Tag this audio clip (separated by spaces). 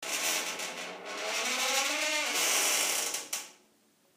creaking door open